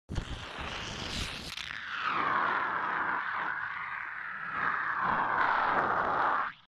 recording of shaving foam1. A sound that can let multiple associations arise when listening.